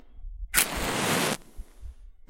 Lighting up a match recorded on H4N MONO